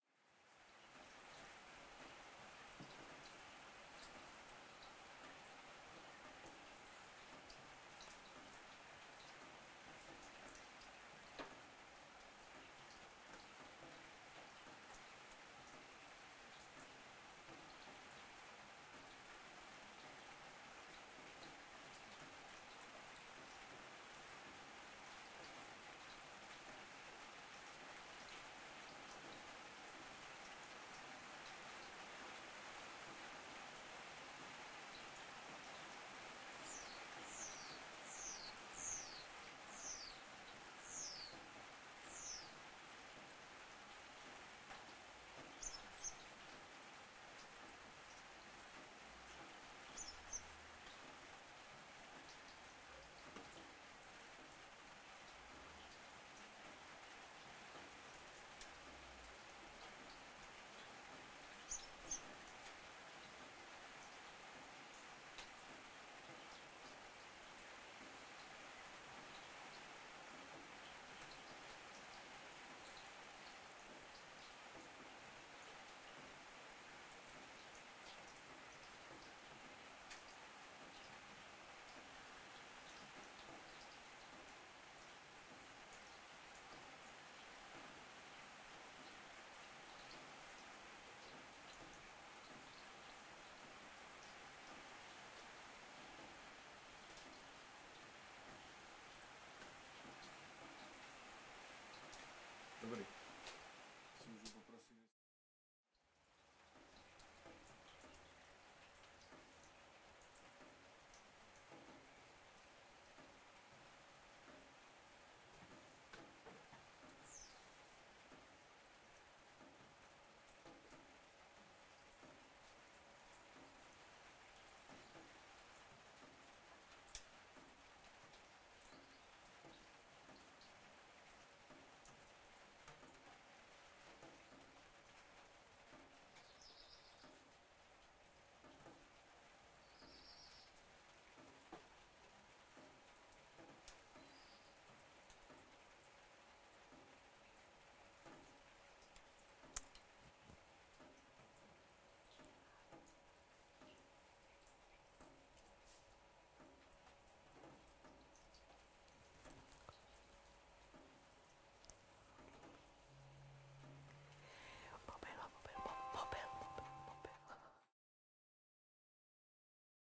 raining under roof 2
Raining under roof was recorded with two mics (lavier and boom). The second mic is another track ("raining under roof").